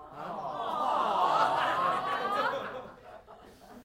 Group of people saying "awwwwww"
According to the urban dictionary: "Awwww" is used to express a sentimental reaction to warm fuzzy experiences. (The number of W's at the end is arbitrary, but at least two or three normally occur in this word.) Also used as an expression of sympathy or compassion.
Sony ECM-99 stereo microphone to SonyMD (MZ-N707)
crowd, group, human, sympathy